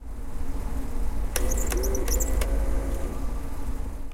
Sound of a card extraction of one of the printers inside 'Tallers' area.
car-extraction, extraction